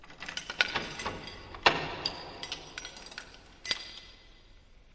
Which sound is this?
Clattering Keys 01 processed 02
shaking, rattle, metal, shake, rattling, motion, shaked, clattering